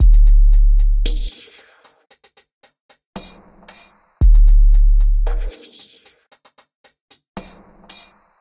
Remix Beat 3142011
A beat I wrote at 114 BPM. Enjoy!
shifter, tr-808, baugh, hi, hop, apple, hat